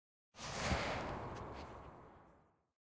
Fast approaching Swish